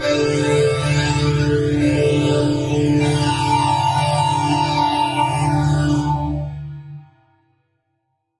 FL studio 12
VSTI: 3x Osc-Melody1
Tone: D6+D4+C7
Tempo: 100
F Stereo Shaper: stereoize
F Reeverb 2: large hall
F Love Philter: stronger phaser
F Chorus: polychorus
Vocodex: droplets
fruity, field-fl, field-3xOsc, field-recording